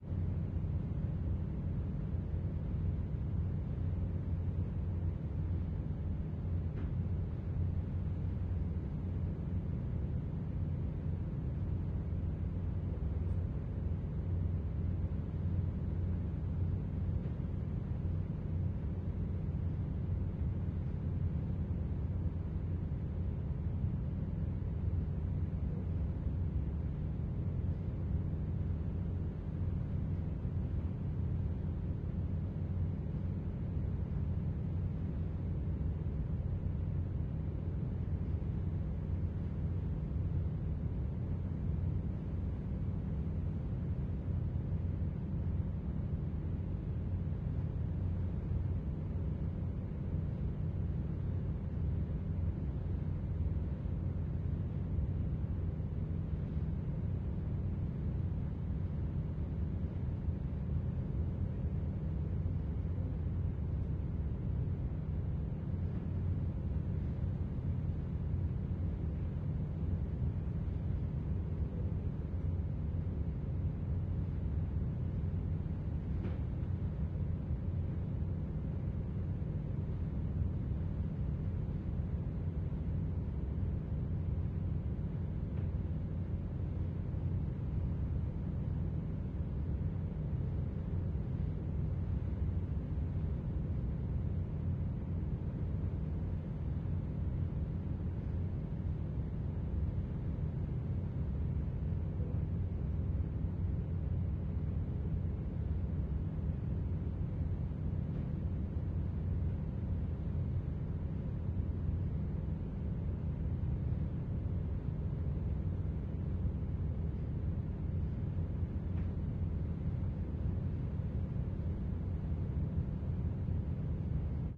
theres the sound of a ship engine working at the sea